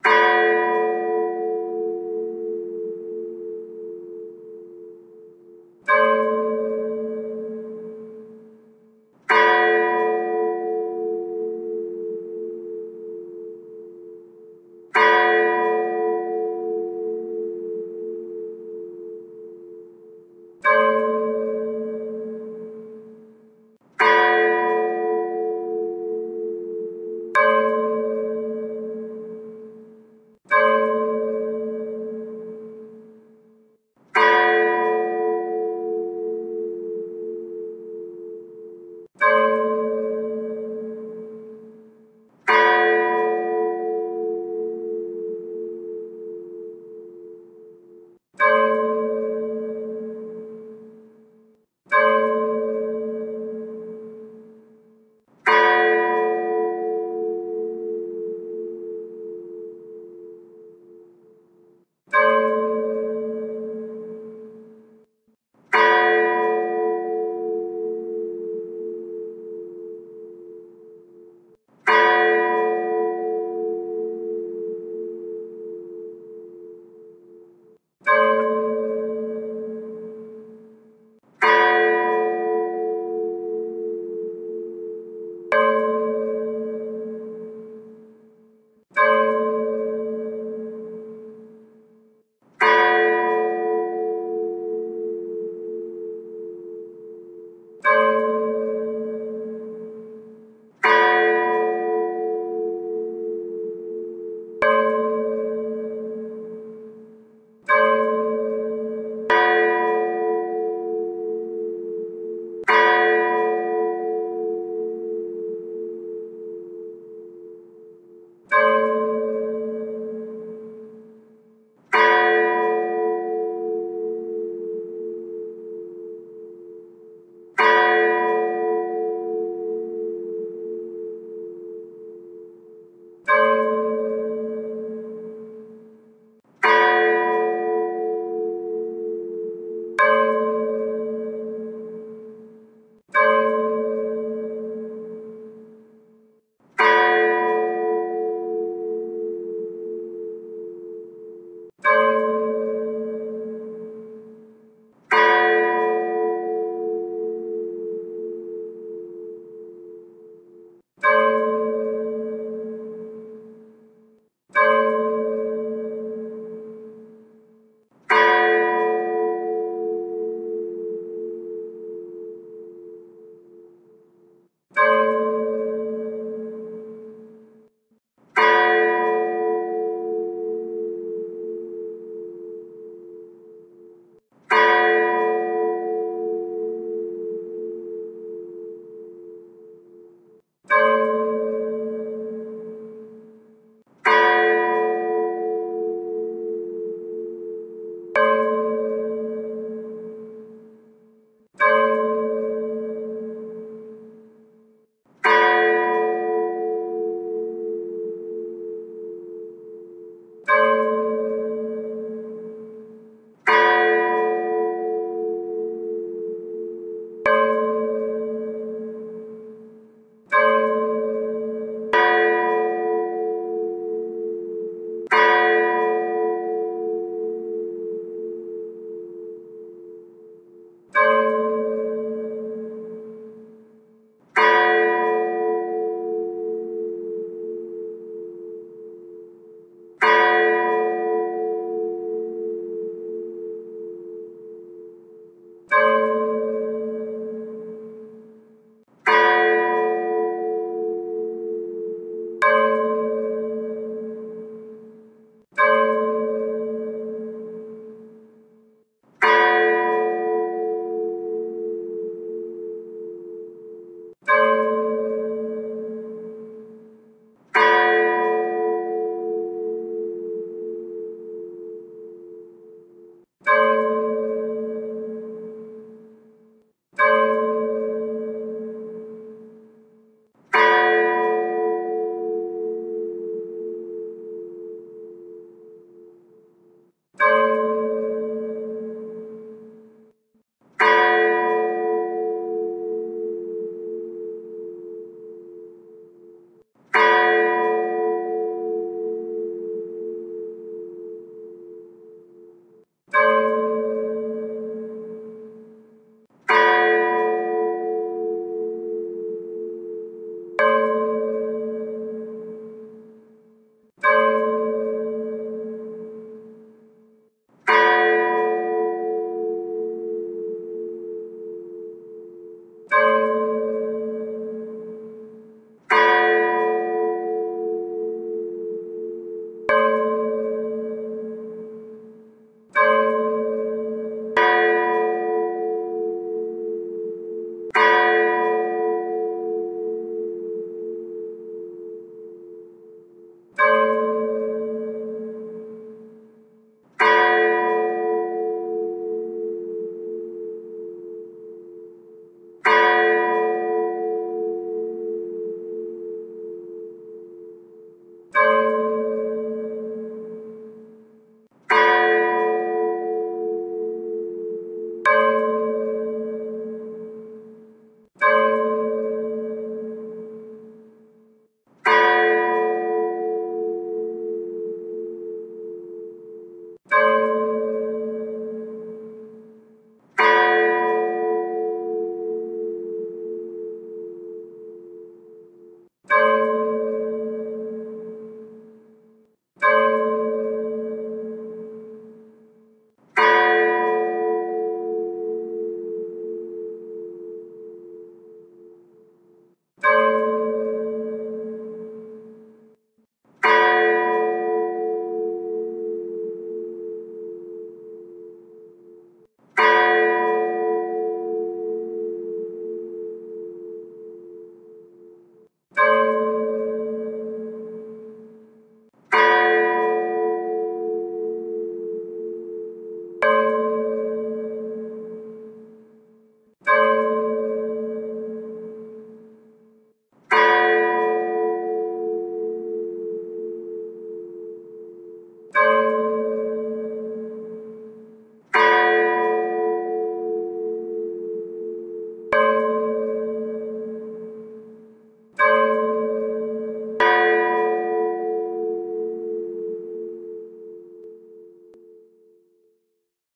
for a theater project, I needed 'Belgium' style funeral bells, did not exist as such so I tweaked sound until the producer was happy